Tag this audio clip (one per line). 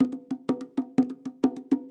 bongo
drum
loop
percussion